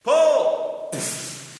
Vocal sound effect of pulling and shooting a clay pigeon target.
clay-pigeon, target, pull, shoot